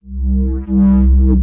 MS - Neuro 009
my own bass samples!
neuro, bass, dubstep, dnb, bassline